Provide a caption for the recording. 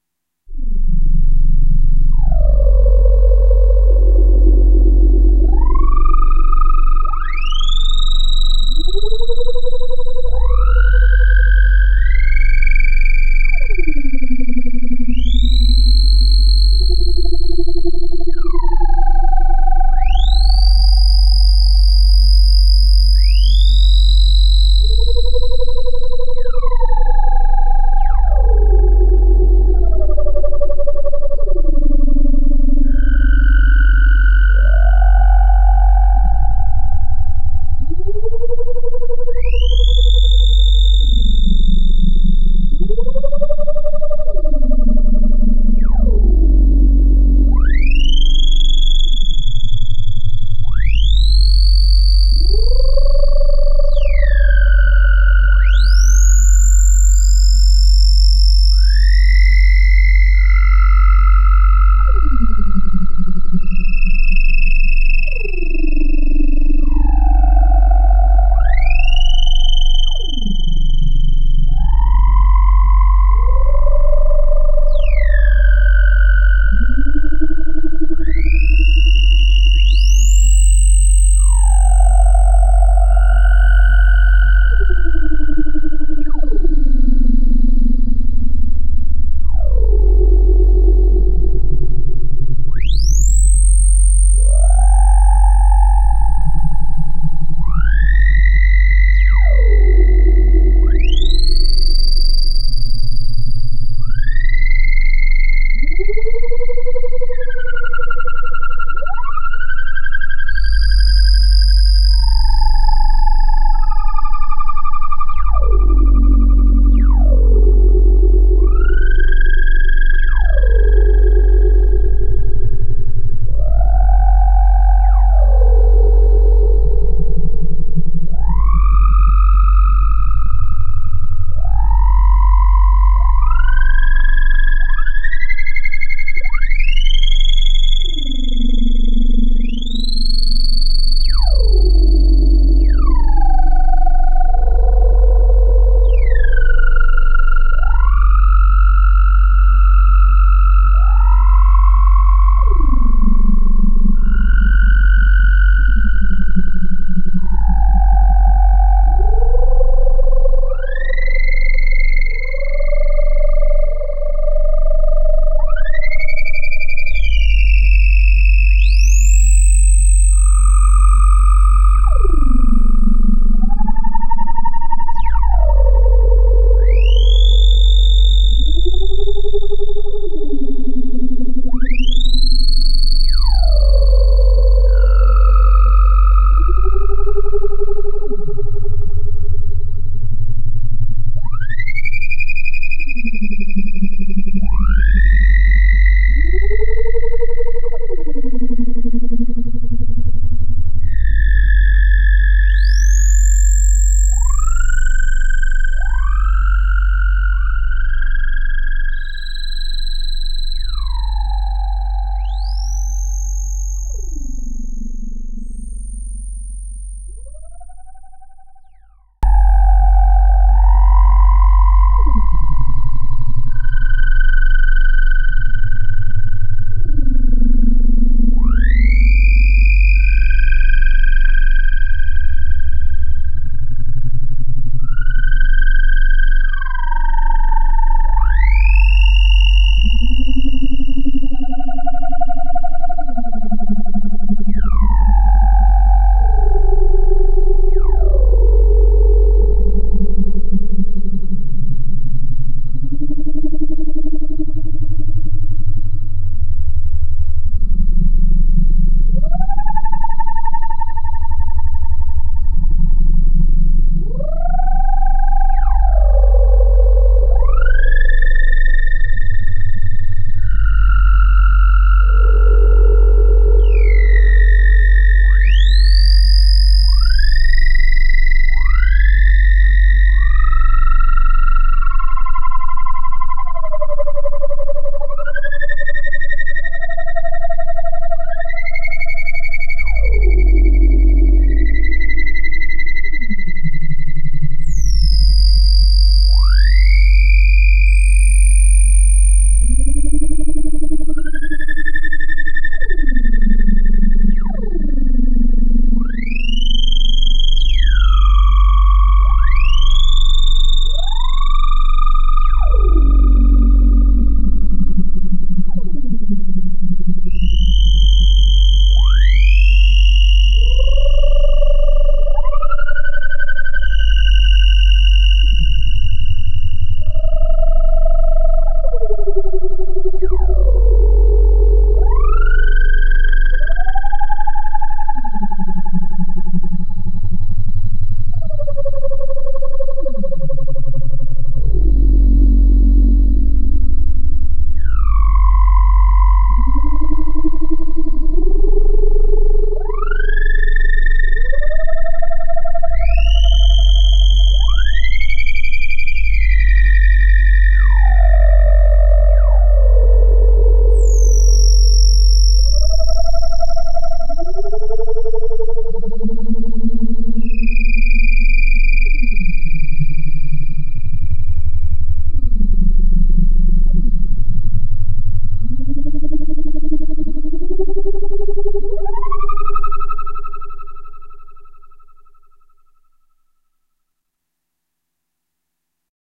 Antony EPNordLead 3
nordlead, 3